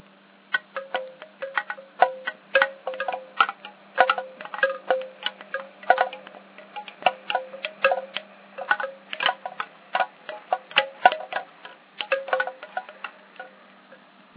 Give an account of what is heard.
bamboo wind chimes
Wooden wind chimes recorded on pendrive at 8kHz and 16bit. Aug 2007.
wooden, windchimes, percussion, bamboo, chimes, percussive, wind-chimes